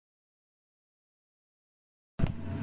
Sonido de un sombrero al caer